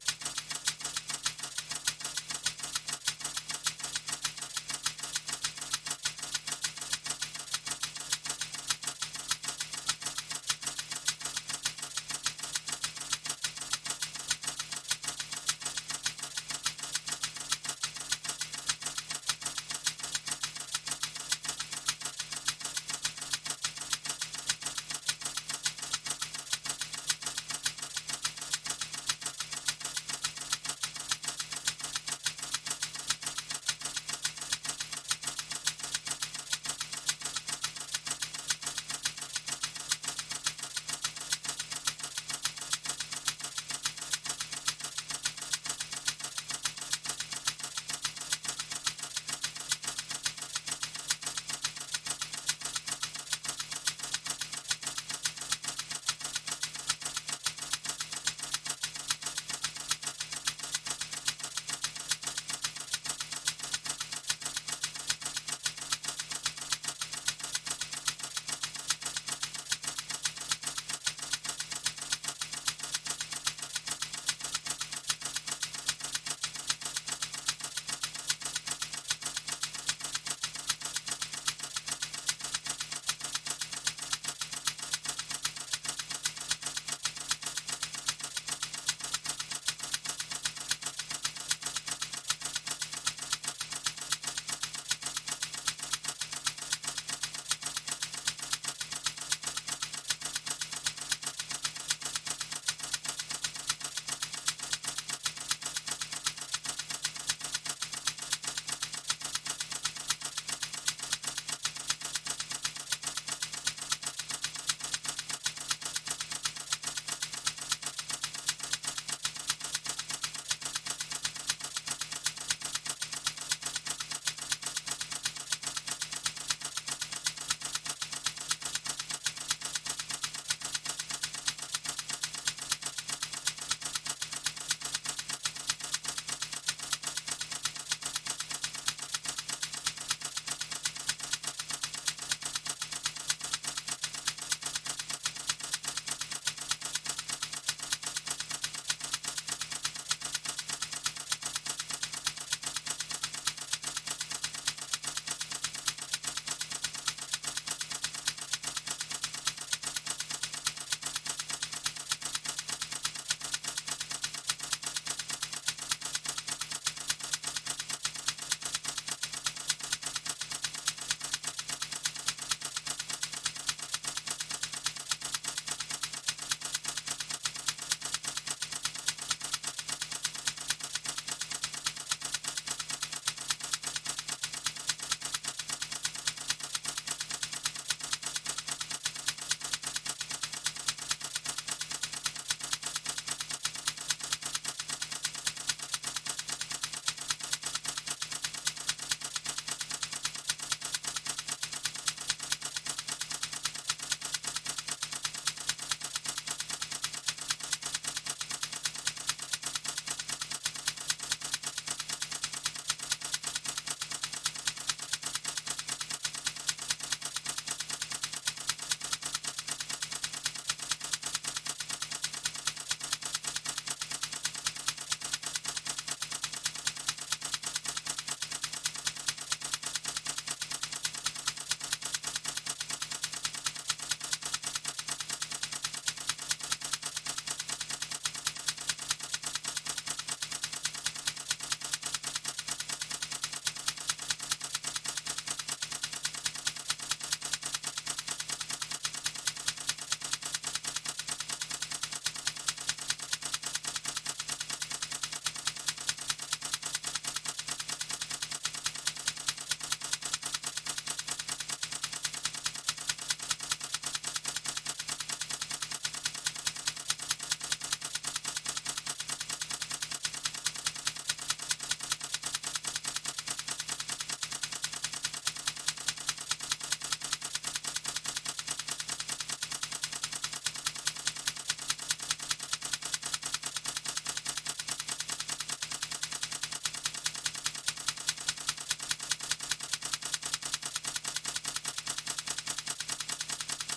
A typewriter rhythm with a strange drone in it, slightly different from other file.